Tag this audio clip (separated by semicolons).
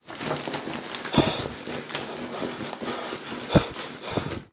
despair; mess; room